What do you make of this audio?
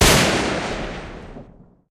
A loud, single gunshot created with Audacity.